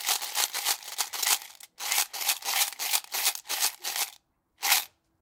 Change Rattling
Change, cup, Rattling